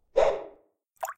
fishingreel throw
The sound of a fishing reel being cast out and then a bobble hitting the water.
Fishing, reel, throw